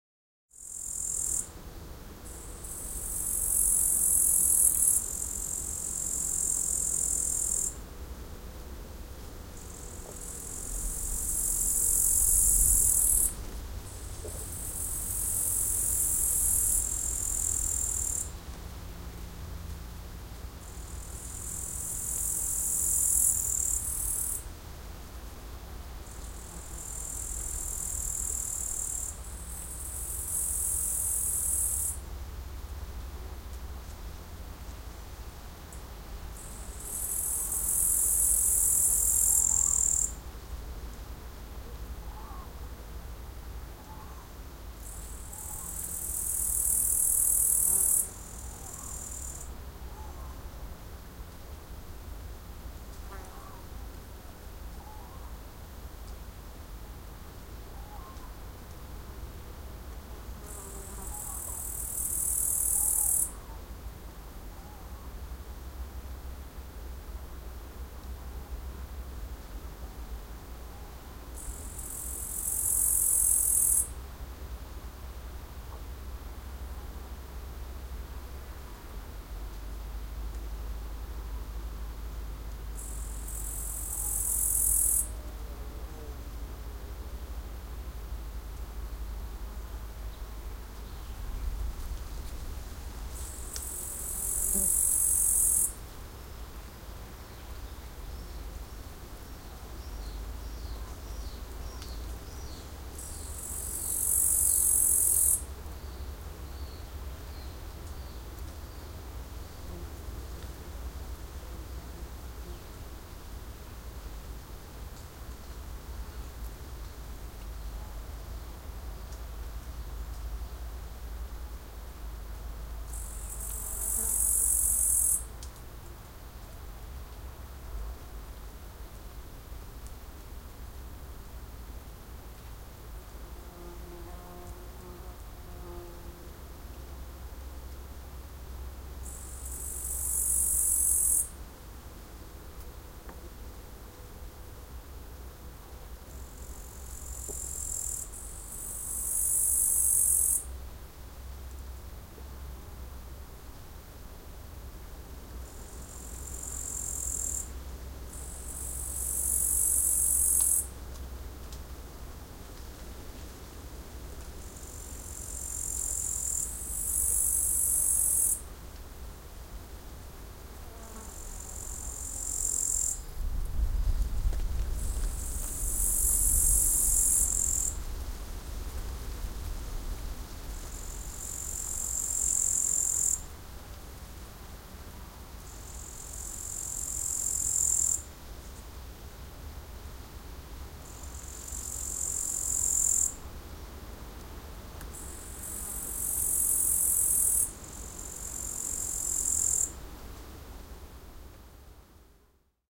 Bush crickets (katudids) chirping // Hepokatit sirittävät kaislikossa
Hepokatit sirittävät kaislikossa. Taustalla vähän kaislikon suhinaa, hyönteisiä, ja välillä kaukana lintuja.
Paikka/Place: Siikalahti, Parikkala, Suomi / Finland
Aika/Date: 2003
finnish-broadcasting-company, yleisradio, bush-cricket, sirittaa, hepokatti, katydids, chirping, insects